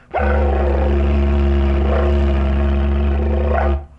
Didg Rolling R 4
Made with a Didgeridoo